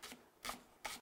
cut, vegetable, cortando, vegetais, legumes